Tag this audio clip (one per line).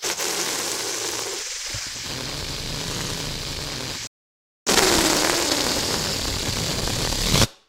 cartoon
deflate